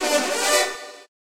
trompetas del norte
nortec, trompetas